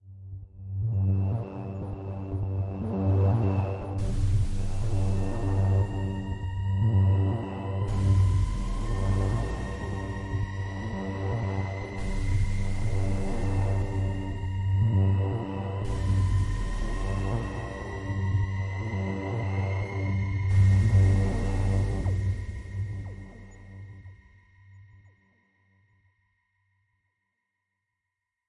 Factory of fear
the sound of horror, factory style.
created with 3 synthesizers: v-station, tyrell (freeware vsti) & plex (freeware vsti)
ambient, digital, drone, experimental, factory, fx, horror, industrial, noise, sci-fi, sound-effect, synthesis